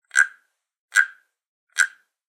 wooden frog fast
A decorative wooden frog bumpy on its back making guiro-like sounds when combing with a wooden rod. Fast rod movement.
Recorded by Sony Xperia C5305.